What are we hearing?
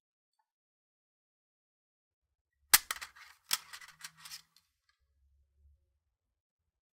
Light Electronics Shaking
A sound of light electronics being briefly shaken. A little plasticy, with loose components, for some sort of small doodad. Recorded on Blue Snowball for The Super Legit Podcast.